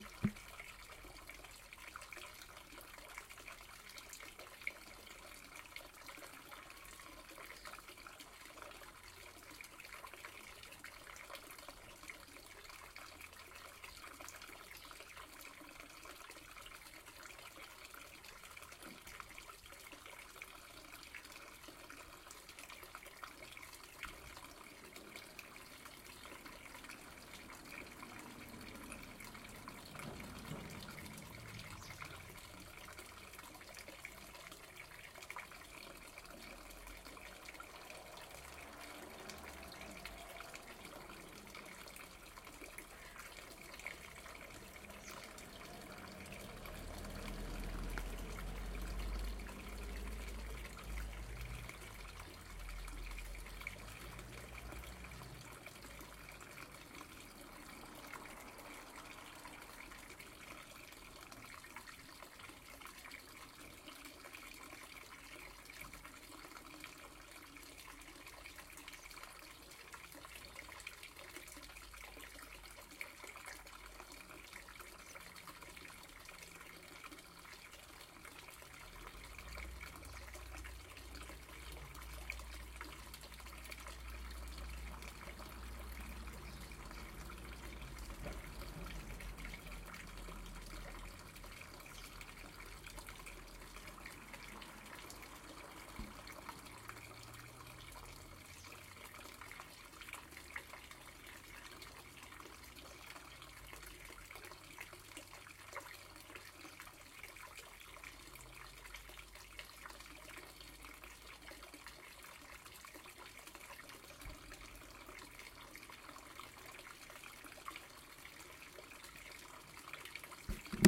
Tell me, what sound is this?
Village foutain-1
A village foutain well nearby. Distant voices of children. A car drives by.
ambiance, field-recording, spring, village-fountain, village-noises